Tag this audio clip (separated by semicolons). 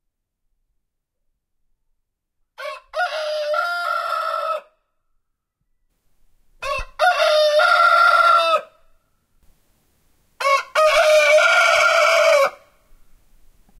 chicken; crowing; farm; rooster; rural; wake